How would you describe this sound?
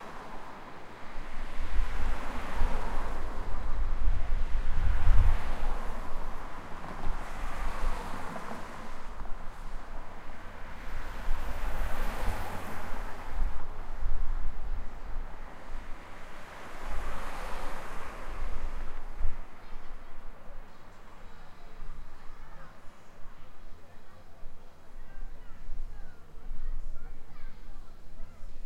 Downtown Palm Springs Street (Cars Passing By)
Taken at about midnight on a Saturday night / Sunday morning while waiting at a bus stop on Indian Canyon Drive in Palm Springs, CA. I used a Tascam DR-07MK2 recorder.
midnight, cars, traffic, city, downtown, late-night, field-recording, street, palm-springs